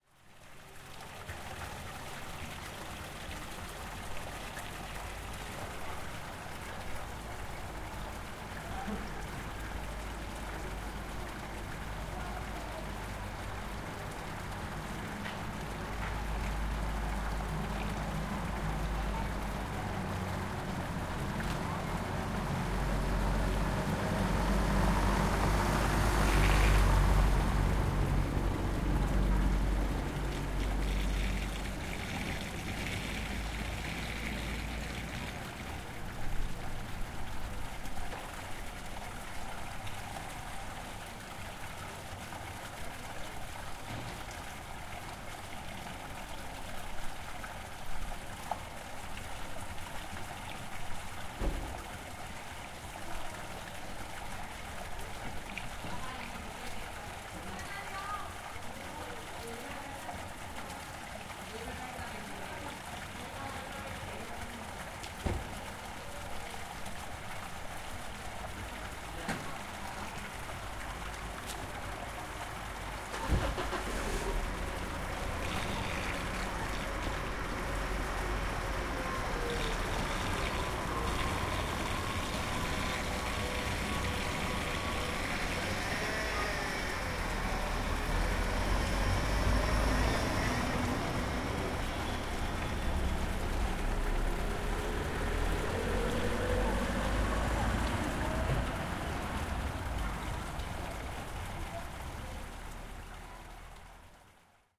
Alanis - Plaza de la Salud
Date: February 23rd, 2013
The sound of Plaza de la Salud in Alanis (Sevilla, Spain) in a cold winter evening. There are some bars around, with not many people inside.
Gear: Zoom H4N, windscreen
Fecha: 23 de febrero de 2013
El sonido de la Plaza de la Salud en Alanís (Sevilla, España) una noche fría de invierno. Hay algunos bares alrededor, no con demasiada gente dentro.
Equipo: Zoom H4N, antiviento
Espana, calle, cars, field-recording, grabacion-de-campo, plaza, traffic, trafico, village